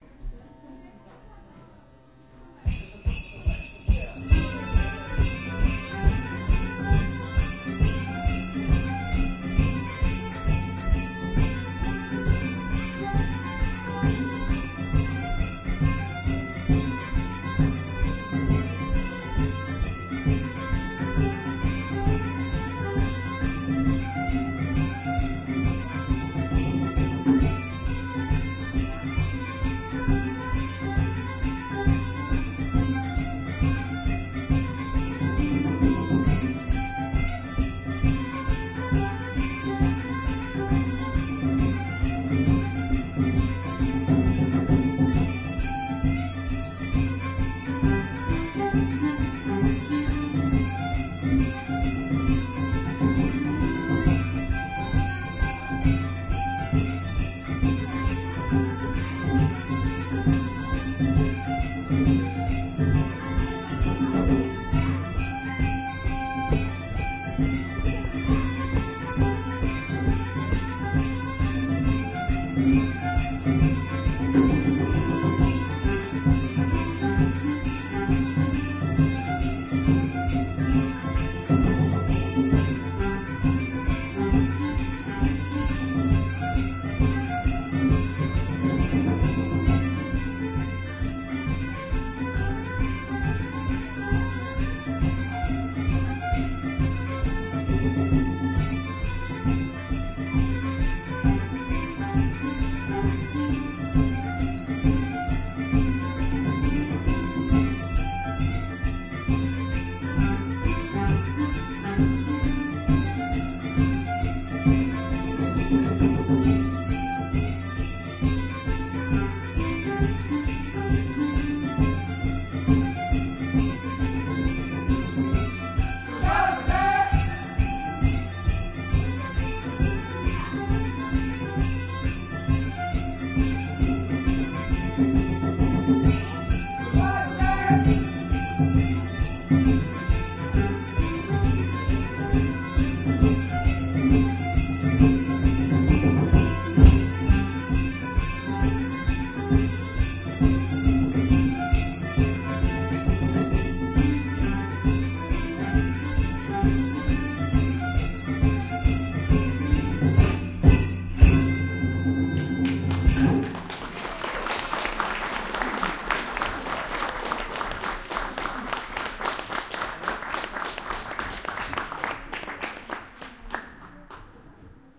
Music from the middle ages 03 2013-08-10 Saltarello
"Saltarello" (Italy, late 1300)
This was recorded a fine Saturday in august, at the local viking market in Bork, Denmark. Three musicians played a little concert inside the viking church. Unfortunately i have no setlist, so i can't name the music.
Recorded with an Olympic LS-100 portable recorder, with internal mics.
Please enjoy!